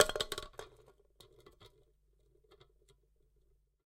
Tin can hitting the ground and rolling to a stop